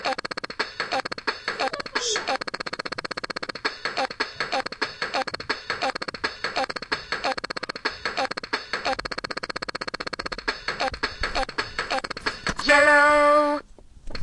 Toy=damaged
This toy was low on batteries and couldnot complete a loaded sound so it loped it until I forced to play another sound. It gave the original soundbyte a rhythmic take.
loop, low-battery, toy